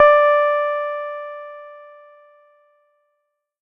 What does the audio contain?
011-JEN Pianotone -D5
Jen Pianotone 600 was an Electronic Piano from the late 70s . VOX built a same-sounding instrument. Presets: Bass,Piano and Harpsichord. It had five octaves and no touch sensivity. I sampled the pianovoice.